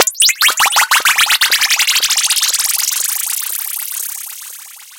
SemiQ effects 16
abstract, future, fx, sci-fi, sfx, sound